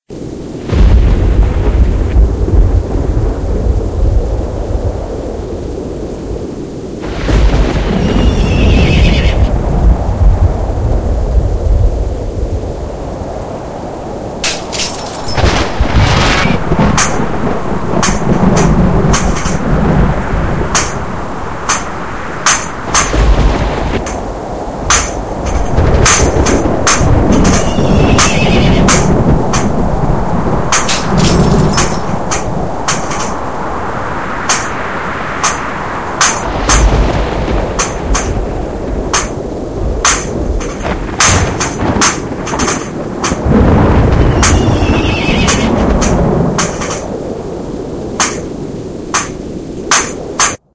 Thunder, Glass Smash, Storm Sounds
This is a thunder storm I made for the show I was in. It took me ages to make it.